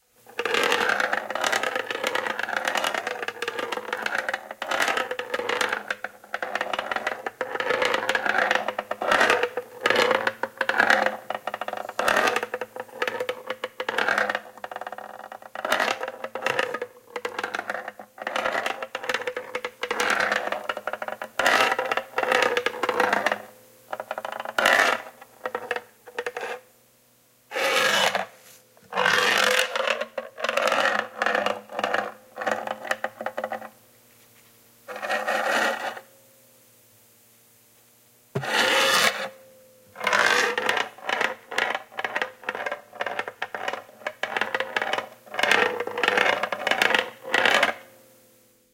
A small screw rolling in a wooden drawer